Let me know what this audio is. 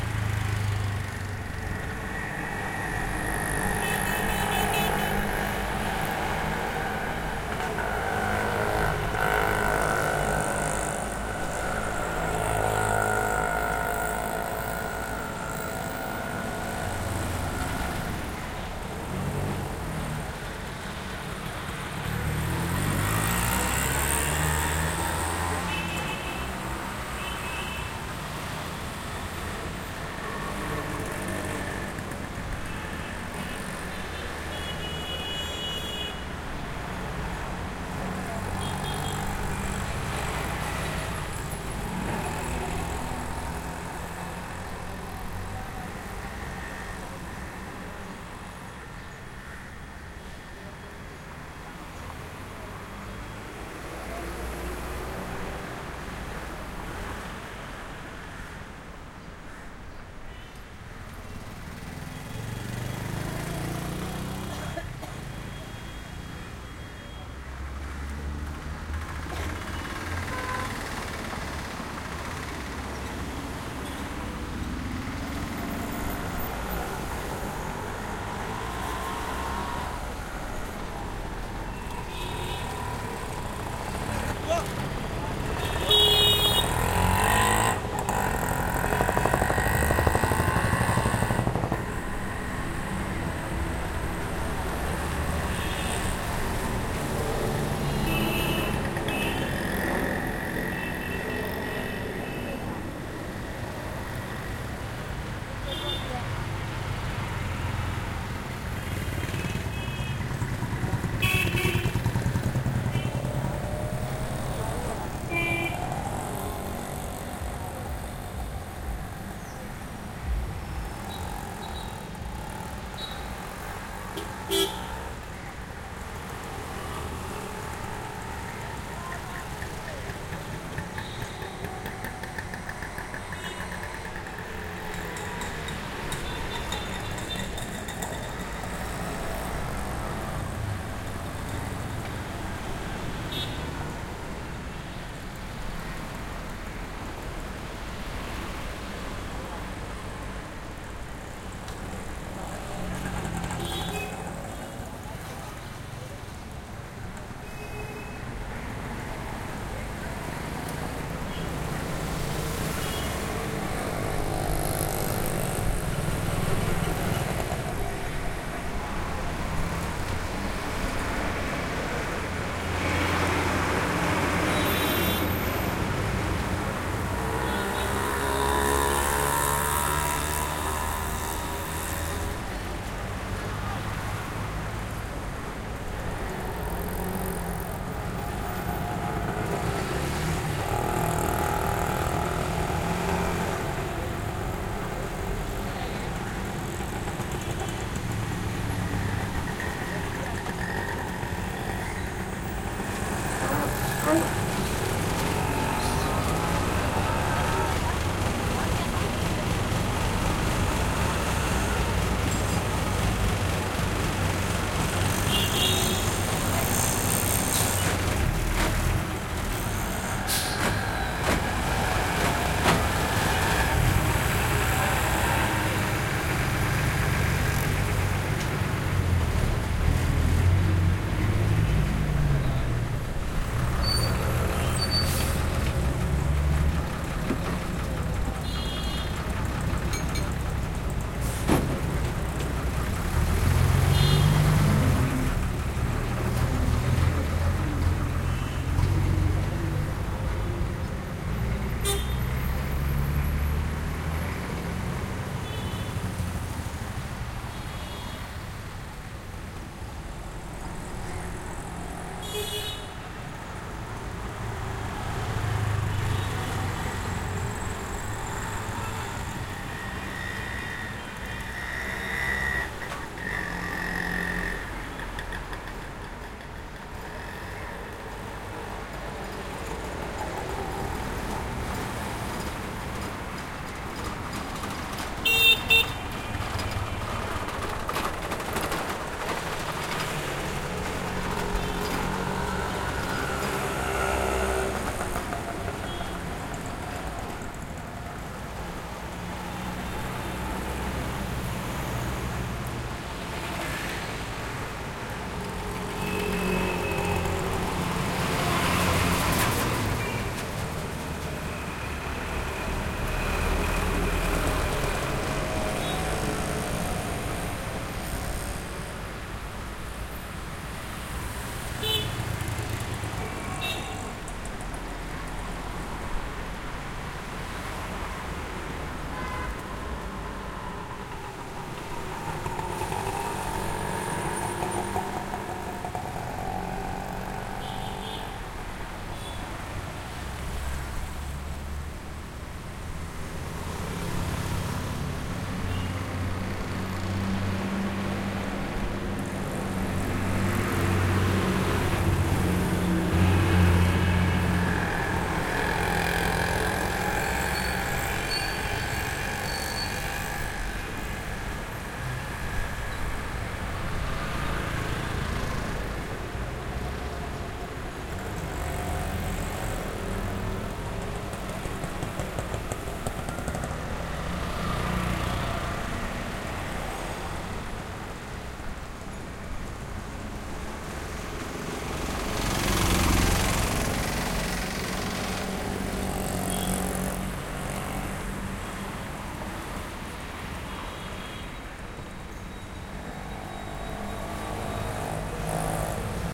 traffic heavy busy smooth throaty motorcycles rickshaws cars trucks horses horn honks airy movement2 India
horn, honks, trucks, India